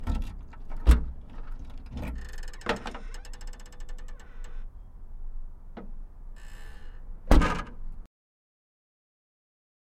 Opening and closing of Ford Taurus trunk